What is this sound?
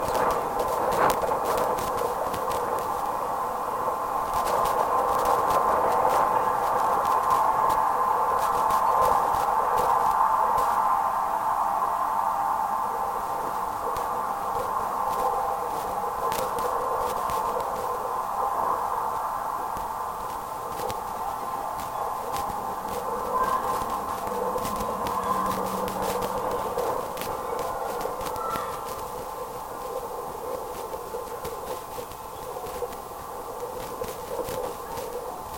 Denver Sculpture Lao Tsu
Contact mic recording of steel sculpture “Lao Tzu” by Mark diSuvero 1994, outside the Denver Art Museum. Recorded February 20, 2011 using a Sony PCM-D50 recorder with Schertler DYN-E-SET wired mic; mic on one of the main legs.